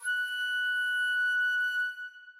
Flute Sustained 7th
These sounds are samples taken from our 'Music Based on Final Fantasy' album which will be released on 25th April 2017.
Flute, Music-Based-on-Final-Fantasy, Reed, Sample